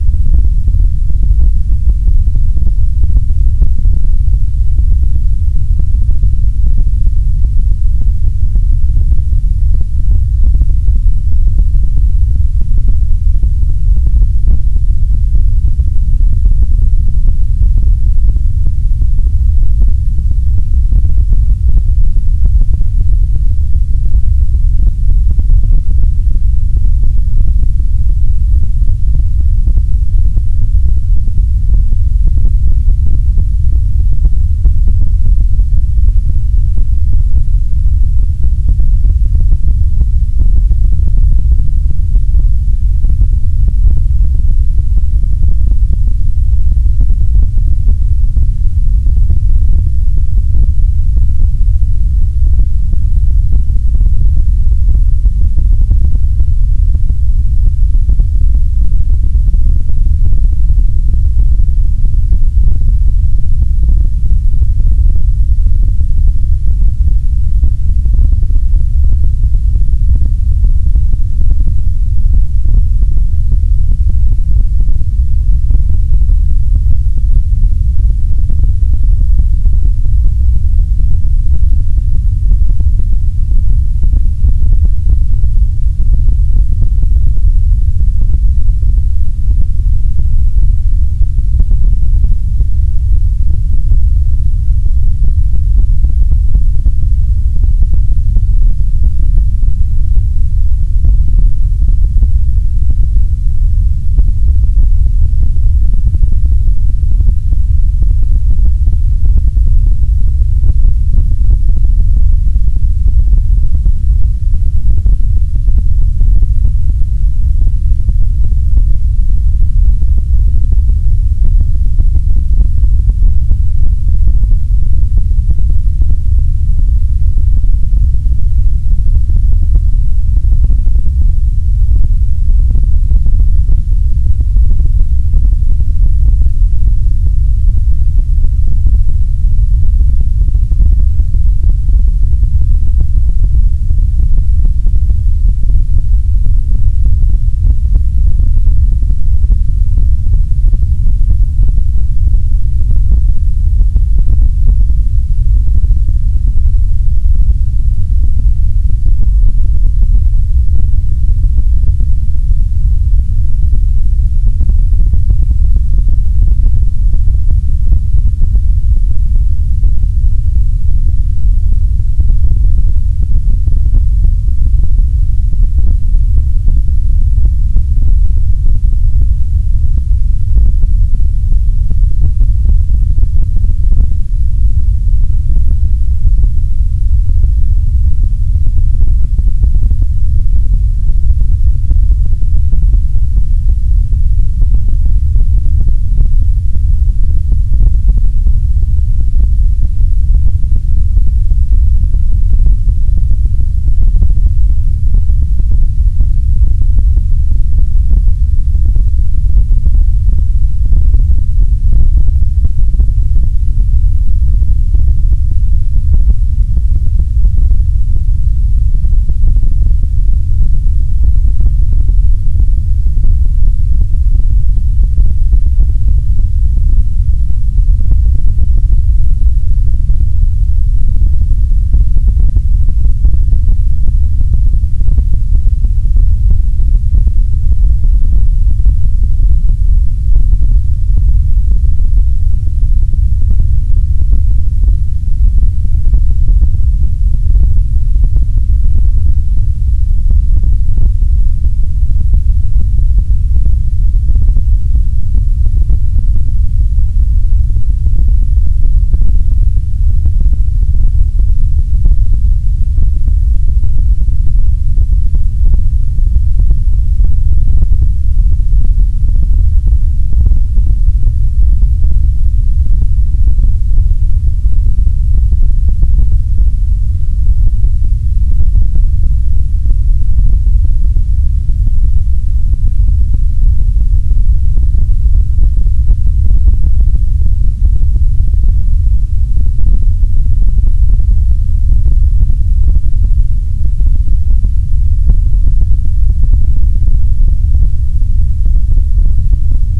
Megabass Whitenoise 5 mins
Heavily altered white noise. I really love this bassy sound, it can shake the floor! I hope it's not upsetting for folks that have experienced earthquakes!
Tech info from Audacity:~ White noise, Leveled on heaviest setting with noise threshold at -80dB,
Normalized by removing any DC offset(centered on 0.0 vertically),(I have no idea what that means)
Amplitude normalized to -50dB,
Bass boosted twice, at frequency 200Hz and Boost 36dB
(the same effect cannot be achieved by boosting at 400Hz and 36dB)
relaxing, white-noise, artificial-sound, deep-rumble, deep, atmosphere, background, bass-rumble, noise, effects, brown-noise, ambience, ambient, sound, fx